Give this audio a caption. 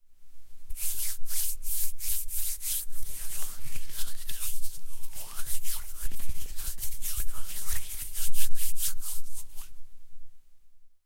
Rubbing palms
Rubbing the palms without any cream. TASCSM DR-05 + Panasonic WM-61